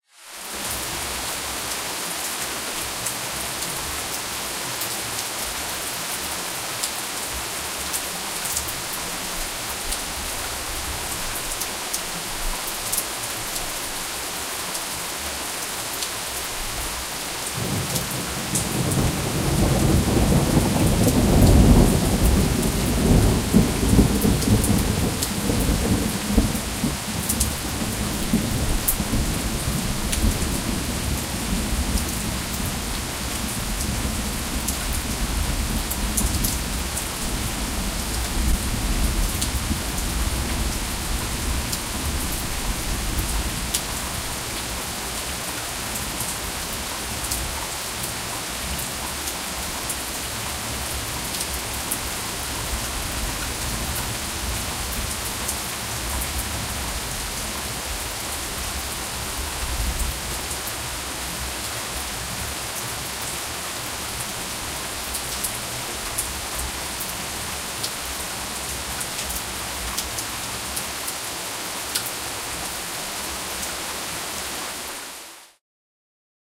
Rain and Thunder Atmo 01
field-recording of my Tascam DR-40 in a bad-weather-situation.
The Thunder sounds really mighty and bass-heavy.
atmo, bad, bass, donner, doom, field-recording, heavy, laut, loud, rain, regen, schlechtes, Tascam-Dr-40, thunder, weather, wetter